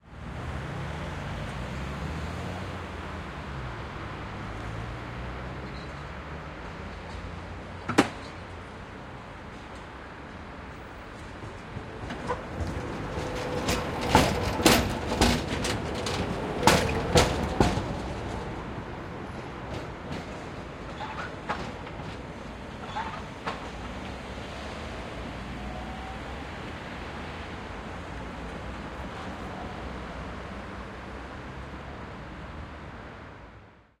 Tram pass by
This is a recording of a tram passing by. It was recorded with DPA-4017 and Ambient ATE-208 in a MS setup. The file is already decoded for stereo (L,R) listening. Recording device: SD-552.